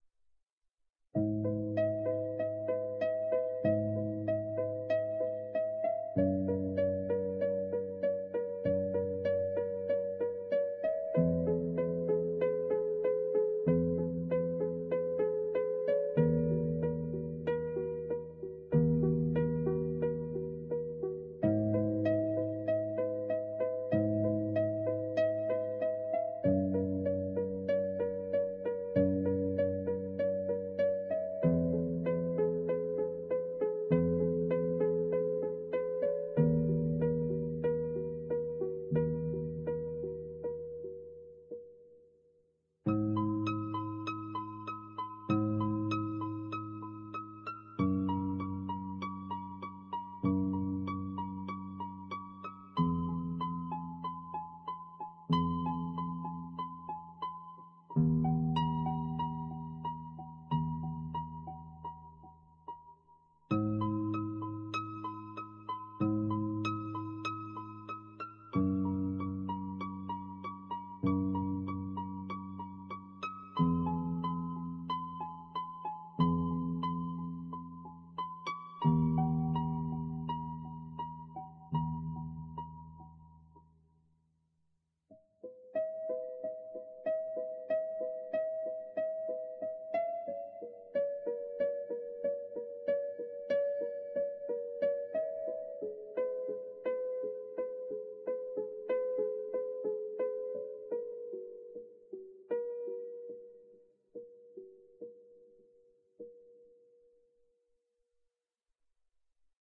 relaxation music #37
Relaxation Music for multiple purposes created by using a synthesizer and recorded with Magix studio.
repetative, harp, meditative